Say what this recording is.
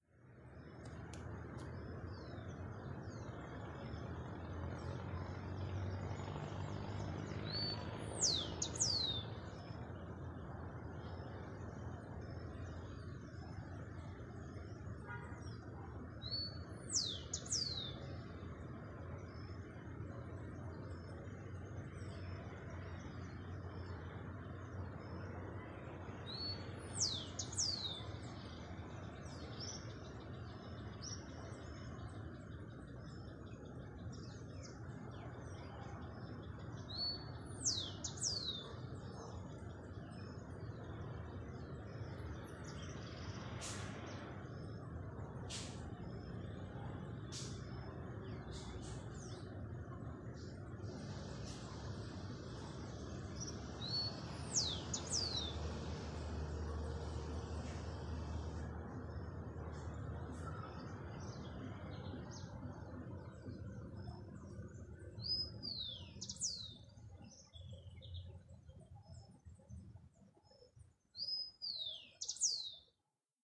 External Environment Morning